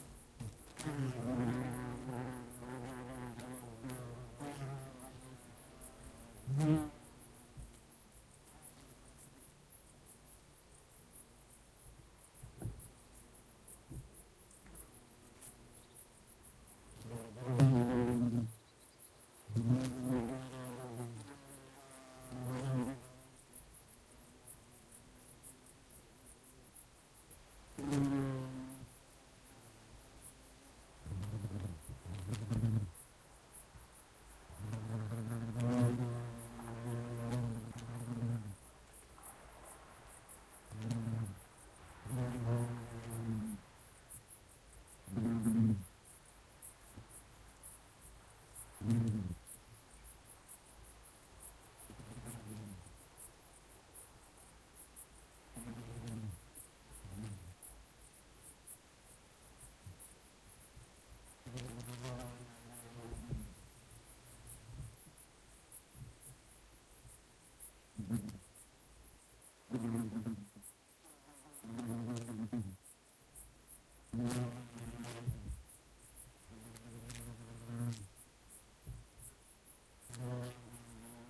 Bumblebees in garden, calm wind, crickets in background 1,21min
I captured three bumblebees buzzing around a flower in a garden, calm wind and crickets in background.
ambience, bumblebee, buzzing, calm, crickets, finland, flower, garden, insects, summer, wind